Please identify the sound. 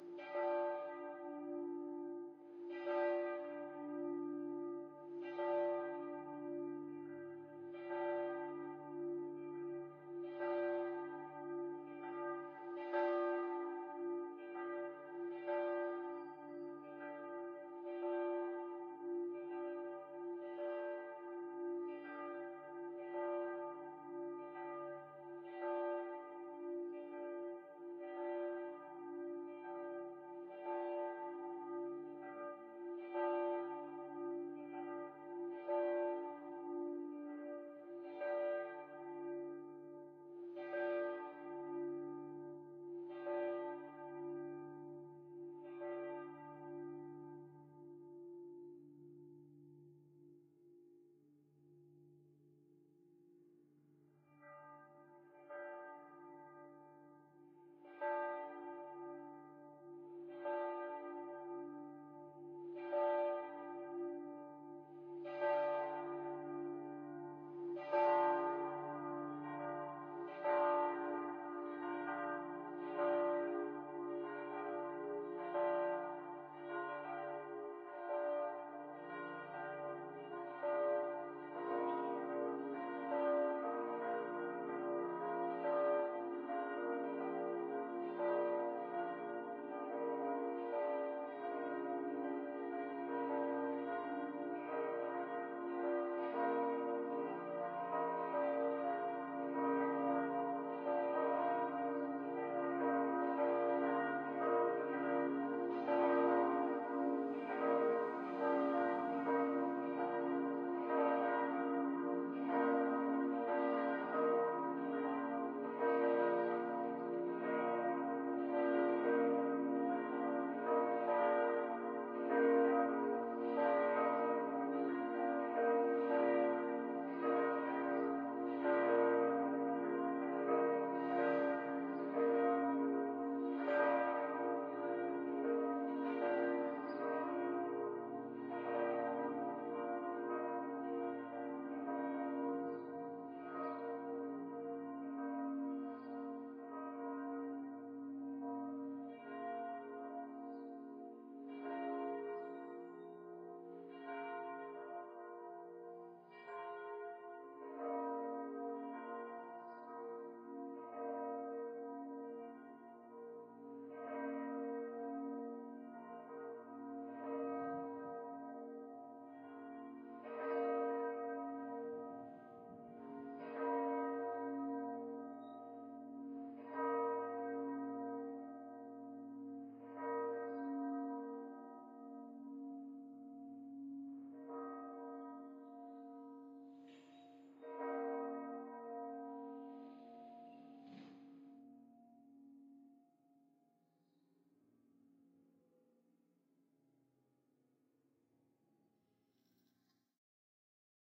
CUA bells rear
Bell tower of the Basilica of the National Shrine of the Immaculate Conception. Recorded on the Catholic University of America campus with a Zoom H2 in four channel surround mode.
The front mikes faced the Basilica. The rear mikes were fairly close to a building and captured an off axis recording of the original peals while the reflection off the building was on axis and is louder than the original peal. The two files can be combined for a surround image.
cathedral, church, bells, church-bells, field-recording, ringing